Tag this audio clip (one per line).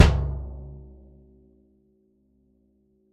1-shot
drum
multisample
velocity